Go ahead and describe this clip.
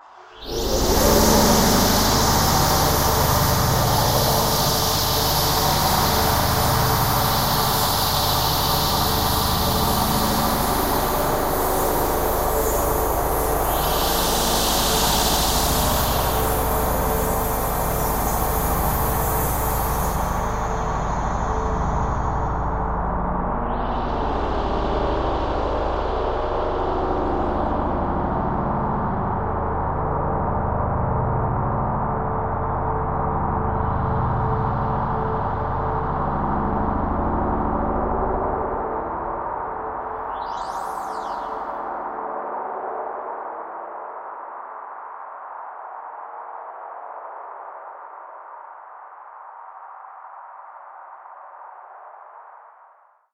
LAYERS 007 - Overtone Forest - G#0
LAYERS 007 - Overtone Forest is an extensive multisample package containing 97 samples covering C0 till C8. The key name is included in the sample name. The sound of Overtone Forest is already in the name: an ambient drone pad with some interesting overtones and harmonies that can be played as a PAD sound in your favourite sampler. It was created using NI Kontakt 3 as well as some soft synths (Karma Synth, Discovey Pro, D'cota) within Cubase and a lot of convolution (Voxengo's Pristine Space is my favourite).
artificial, drone, multisample, pad, soundscape